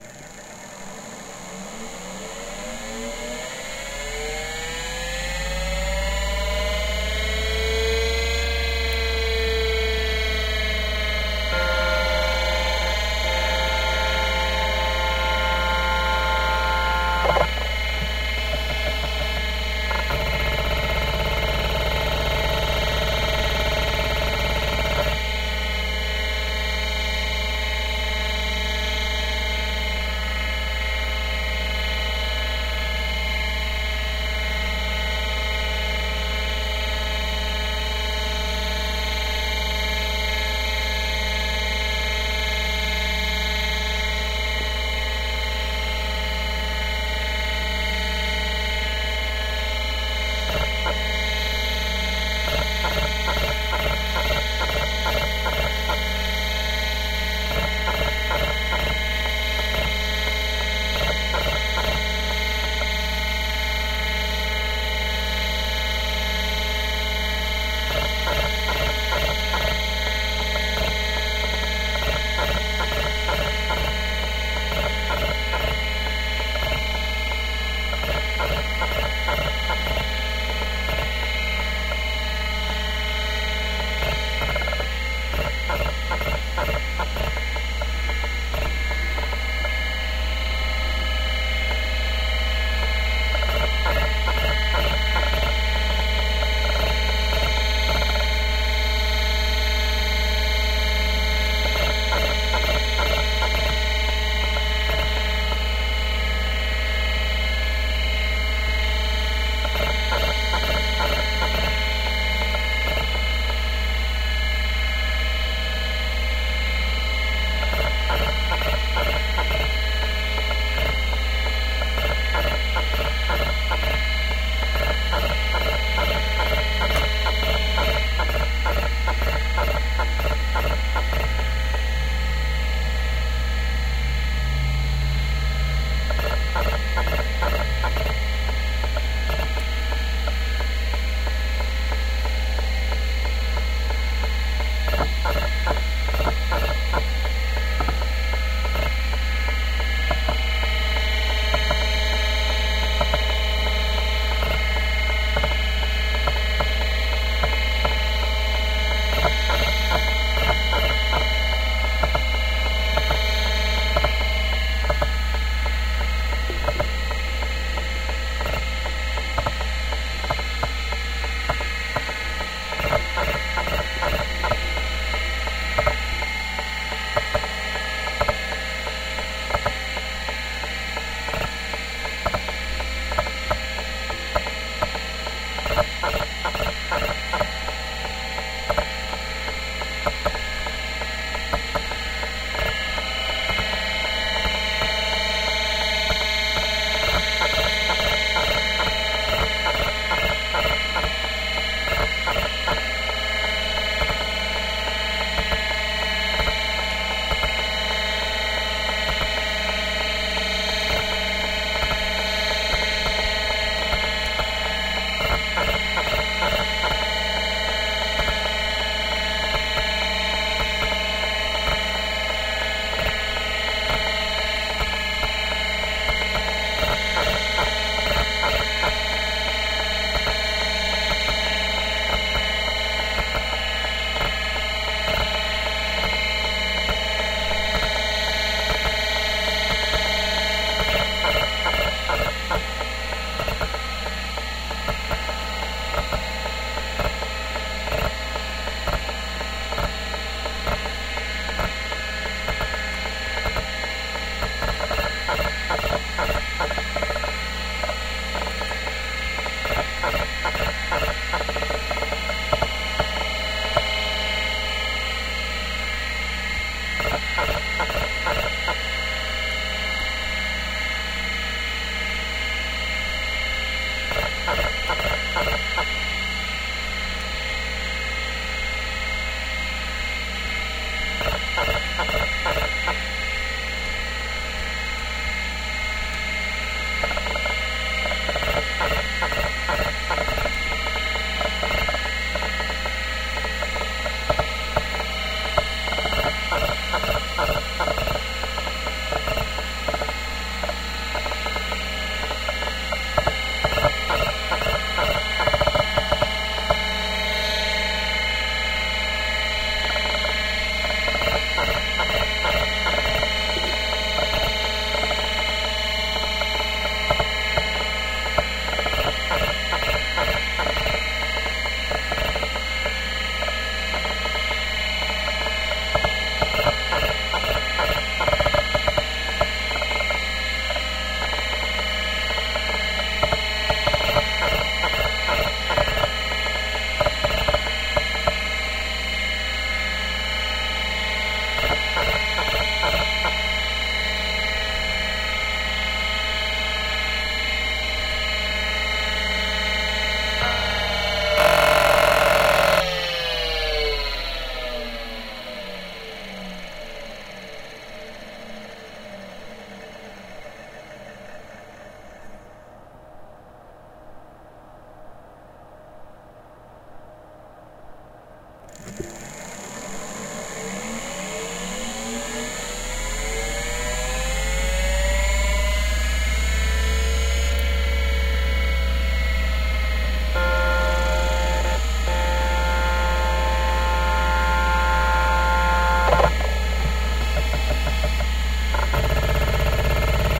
1980s Seagate ST277N 63MB SCSI hard drive being powered up, used for a while, and powered down.